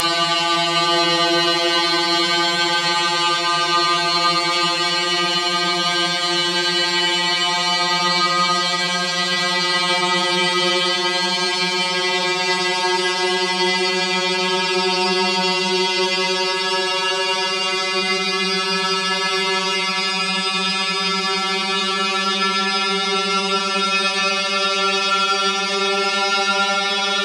Audacity pluck + paulstretch 2X@10each
audacity, drone, eerie, effects, granular, paulstretch, stretch, vivid